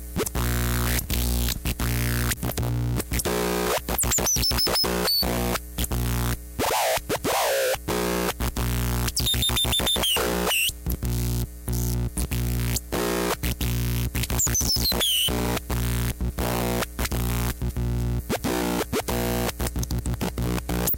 A basic glitch rhythm/melody from a circuit bent tape recorder.